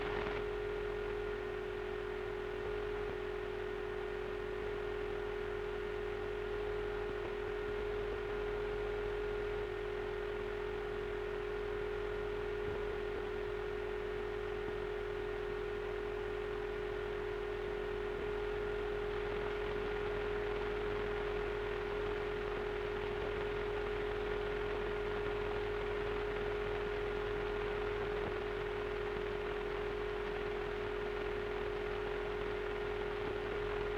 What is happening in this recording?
Radio Static Short Wave Noise 2
Some radio static, may be useful to someone, somewhere :) Recording chain Sangean ATS-808 - Edirol R09HR